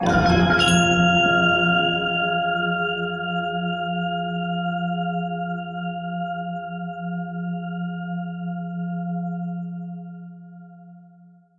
wood metal sequence 4
wood metal processed samples remix
percussion, transformation, wood-metal